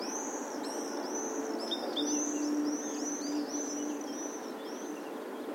Small group of swifts flying past and calling. Recorded with a Zoom H2.
bird, birds, evening, field-recording, flying, forest, morning, norway, swift, swifts